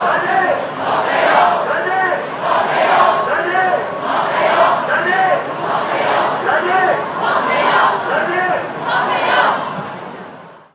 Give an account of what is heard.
Umbrella Movement Protest
Hong Kong protestors cursing the Chief Executive during the Umbrella Movement. The clip was recorded on an iPhone 4 using internal mic with the Voice Memo app.
Protest, Movement, Umbrella, revolution, Hong, Kong